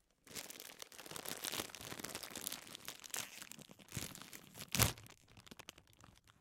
opening chips
noise, uam, 42Naudio17, chips, opening